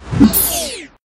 I created these sound FX using my Yamaha PSR463 Synthesizer, my ZoomR8 portable Studio, Guitars, Bass, Electric Drums and Audacity.